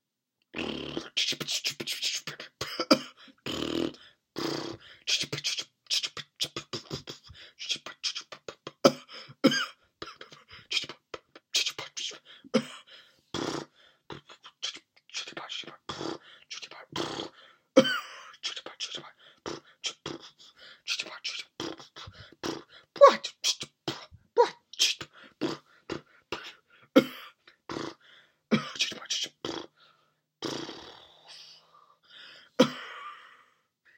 A humorous sputtering car effect made with my mouth.